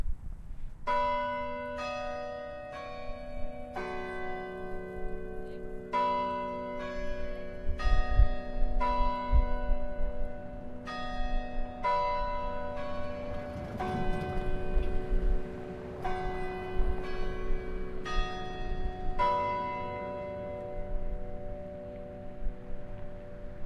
bell tower(isolated)
A quick recording of the bell tower chiming on the hour at Ball State University (added by AJH)
ball, state, bsu, university, bell, tower